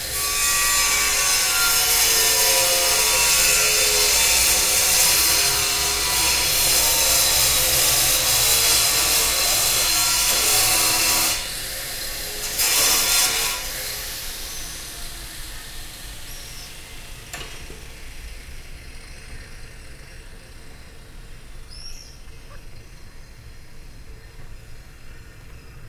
scie Žlectrique
An electric saw on a roof, rec with R09
saw, field-recording, engine